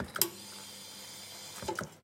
16. cleaning the machine
pre-cleaning the espresso machine, also usable as a sample of making the coffee